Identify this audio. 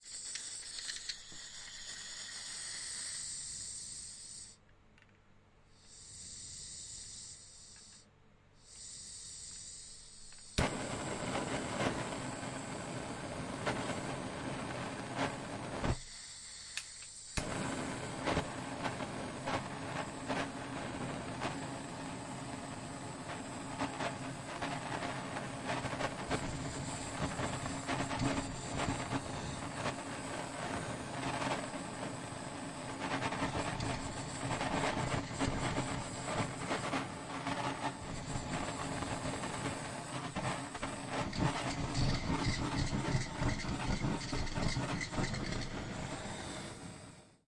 A small catering flame torch used for Brulee etc. being waved around a very small room